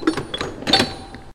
mono field recording made using a homemade mic
in a machine shop, sounds like filename--wrenches as claves
field-recording, machine, metallic, percussion